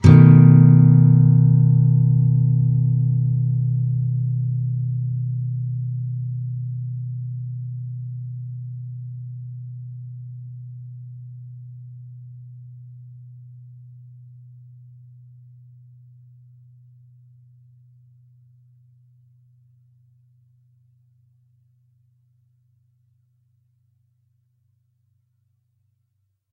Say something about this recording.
B7th thick strs
Standard open B 7th chord but the only strings played are the , A (5th), D (4th), and G (3rd). Down strum. If any of these samples have any errors or faults, please tell me.